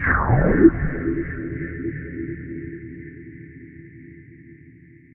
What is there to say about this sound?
there is a long tune what i made it with absynth synthesiser and i cut it to detached sounds